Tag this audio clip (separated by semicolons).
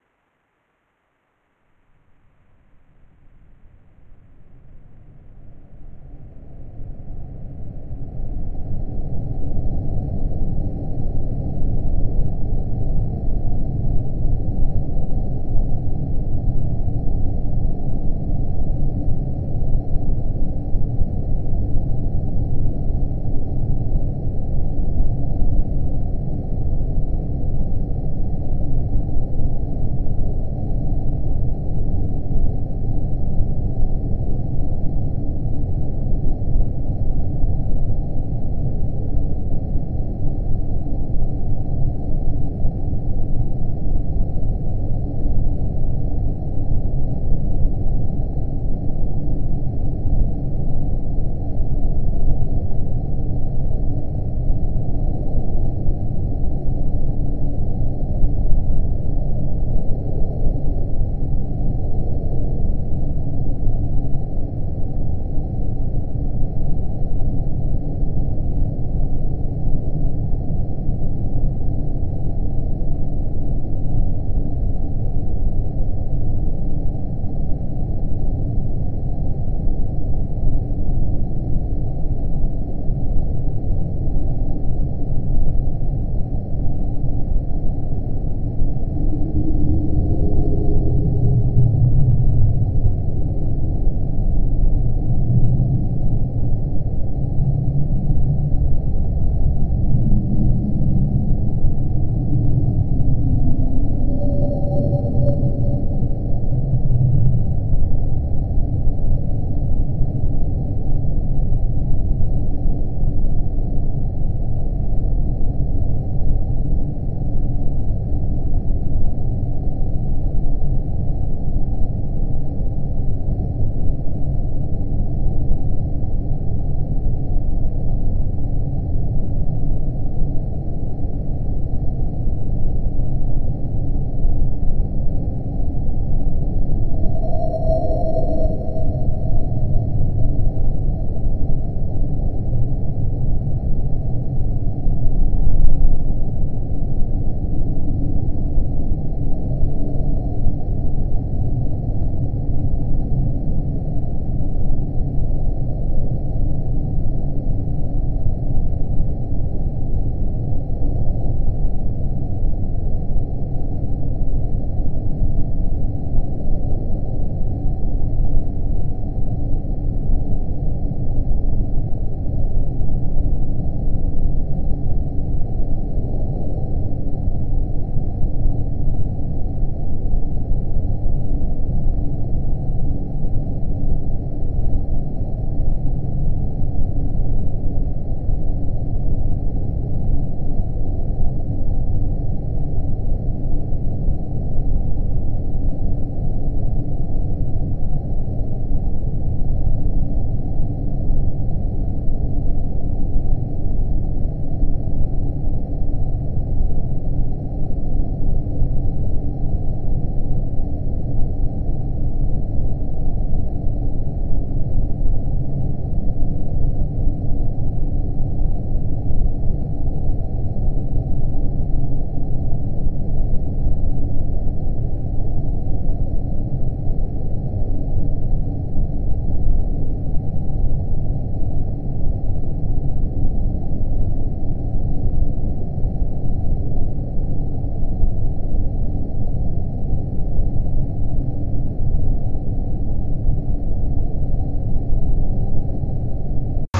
soundscape; space; Mothership; atmosphere; atmos; ambience; ship; sci-fi; aliens